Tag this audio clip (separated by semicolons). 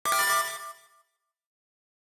fx; gamesound; pickup; sfx; shoot; sound-design; sounddesign; soundeffect